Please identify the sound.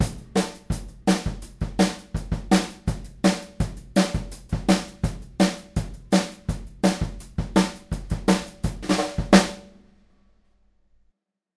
Gretsch snare + Ludwig kit - 4 - upbeat
A drum beat played with "hot rods" for a sound inbetween sticks and brushes. Gretsch maple snare 14x6.5, Ludwig drum kit with lots of tone in the kick.
beat, drum, gretsch, hot, kit, ludwig, maple, rods, snare, upbeat